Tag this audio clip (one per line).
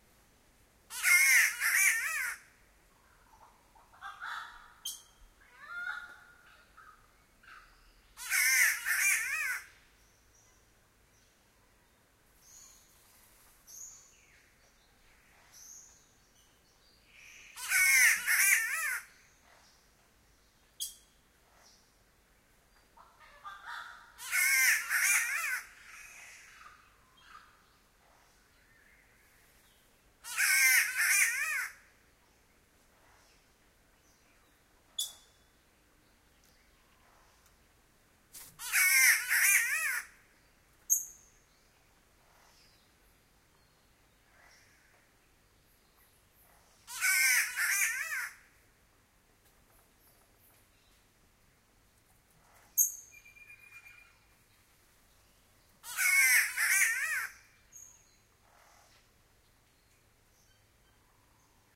ailuroedus-melanotis
atherton-tablelands
bird
carbird
cat-bird
field-recording
lake-eacham
queensland
rain-forrest
stereo
tropical
tropics